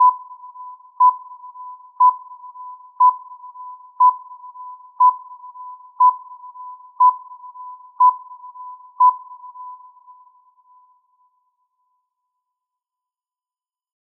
Made this sound a while ago but I must have generated a "sine" wave and just pasted the same thing at the beginning of 10 consecutive seconds. And then I applied some reverb to the final thing.